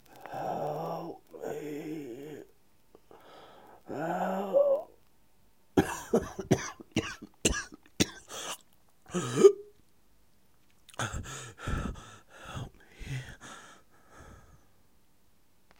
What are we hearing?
Man begs to be saved while dying.